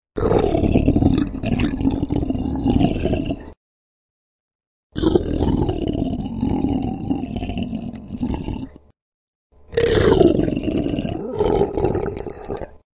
Big monster snarls